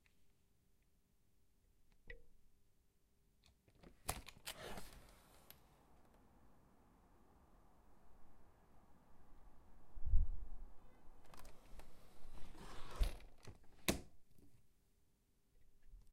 PVC WINDOW
Recording of pvc double glazed window opening and closing with distant traffic noise as window is open.
close, closing, door, open, opening, plastic, pvc, shut, slam, window